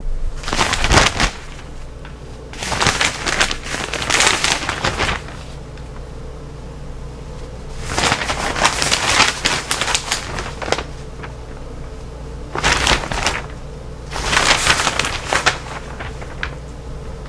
turn newspaper
turn the page, wash your hands.